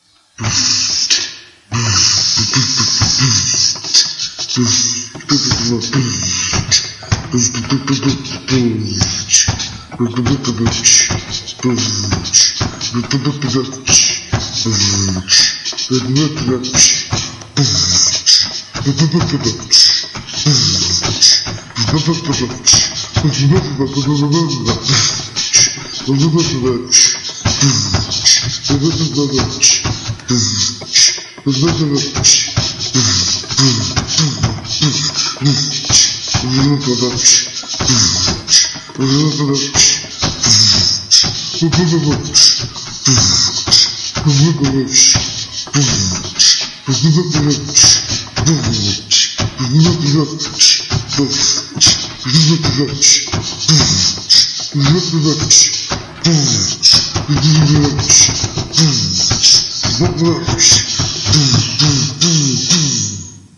Drums sample
badass, music, sample